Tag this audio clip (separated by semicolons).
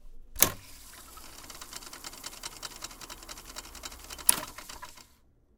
start; stop; turn; tape; play; spin; reel; machine